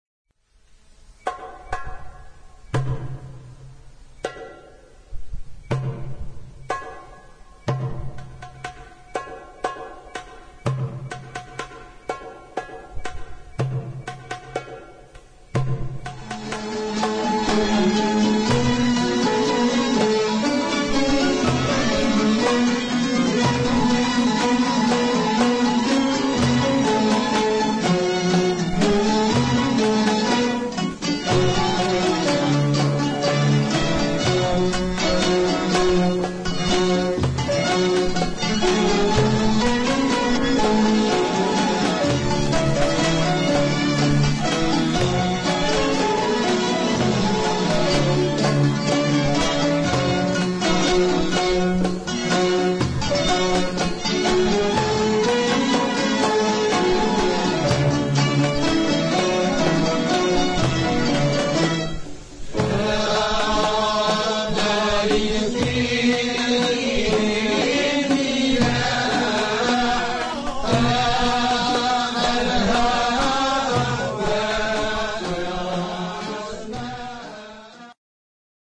Btayhí muwassa (slow) rhythm with ornaments, applied to the San'a "Abshir bil Haná" of the mizan Btayhí of the nawba Rasd